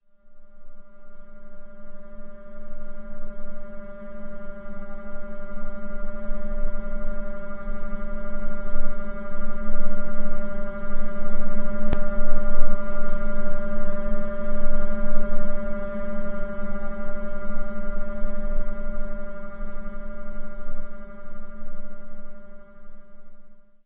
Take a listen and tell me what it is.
Droid Hum
This is one of the sounds I use for the hum of Droids in Bloody, Bold, Irresolute. It can be used for robots, background noise, faulty computers, etc.
computer, hum, machine, Robot, science, scifi